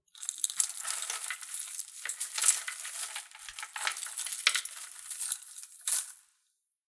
Jewelry moving sounds